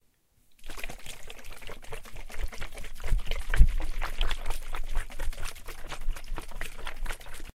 Shaking Water
Water in a bottle being shaken around.
bottle, bottled-water, shake, shaking, splash, water